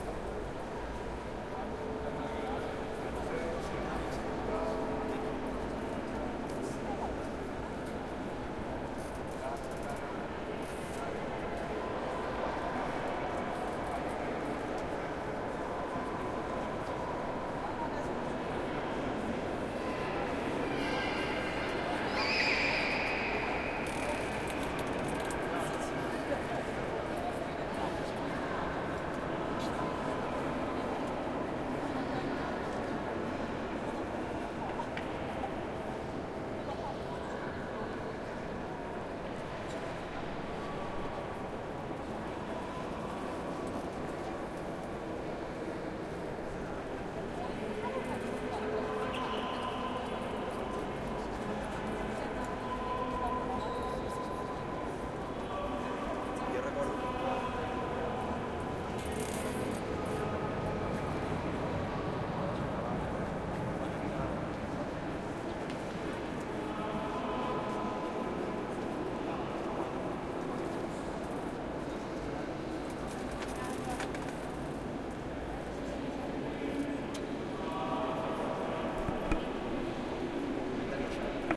sagrada familia cathedral in the middel
This recording is done with the roalnd R-26 on a trip to barcelona chirstmas 2013.
ambience, atmosphere